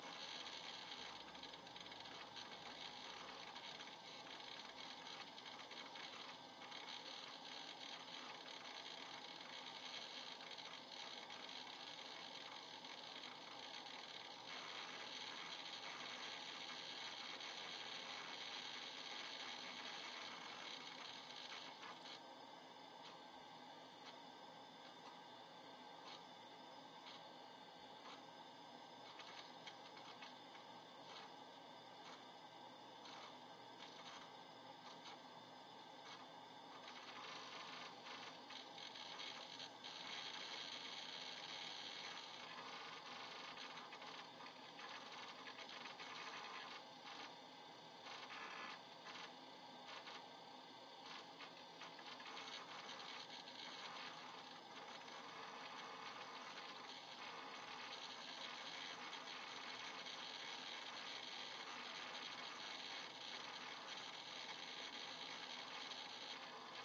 computer PC processing data
PC, processing, computer, data